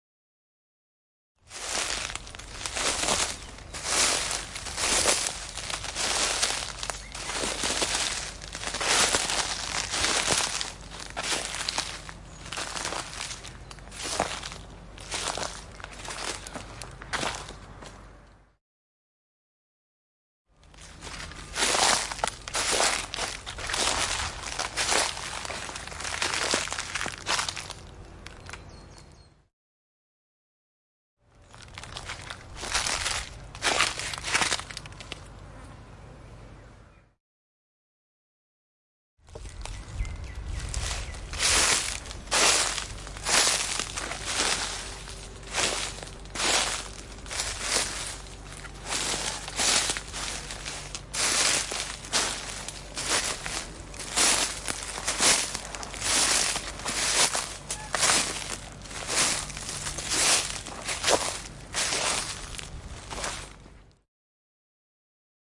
walking on dry leaves - 4 takes
Foot steps on dry leaves 4 takes.
crunch, Field-Recording, foot-steps, leaves, stomping, walking